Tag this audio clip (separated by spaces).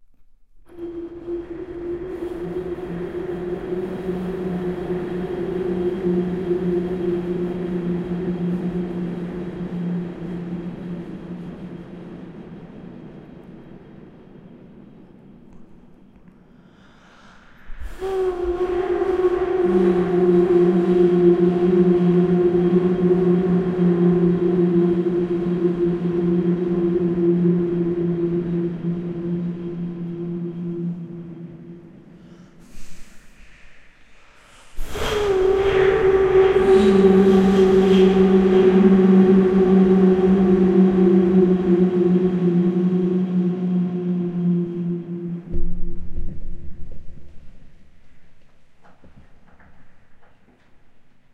strange reverb weird ghost atmospheric dark processed cinematic unusual sound-design haunting deep delay breath ghosts ambience organic horror mask fx wind soundscape mysterious